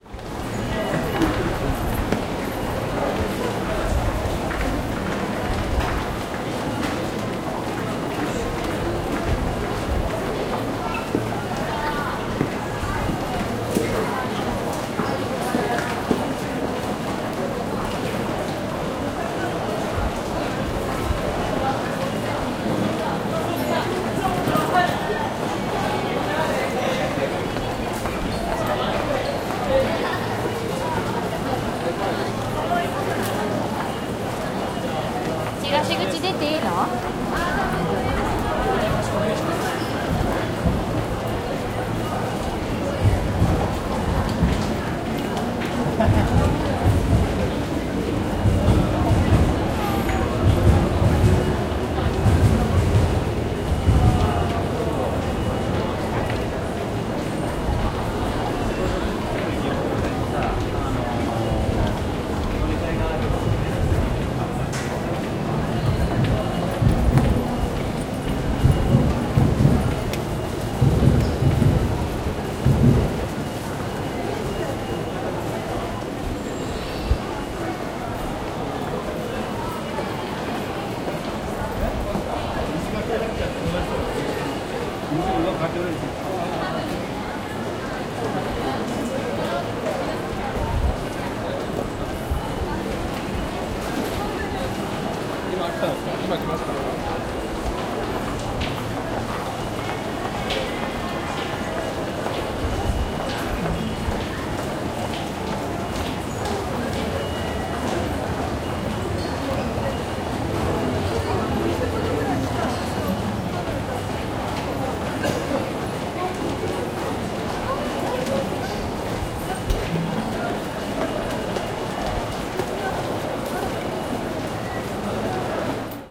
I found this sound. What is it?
In the Ikebukuro Subway station in Tokyo, below ground. You can hear people walking, talking, and the trains pass below and overhead.
Recording made on 23 July 2009 with a Zoom H4 recorder. Light processing done in Peak LE.